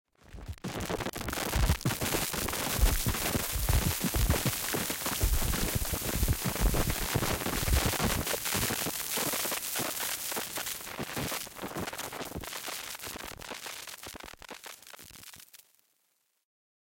Long Woosh Glitchy Fx
Long woosh effect with glitches
abstract, effect, electronic, freaky, future, fx, glitch, noise, sci-fi, sfx, sound, sounddesign, soundeffect, strange, swhish, swish, swoosh, swosh, weird, whoosh, woosh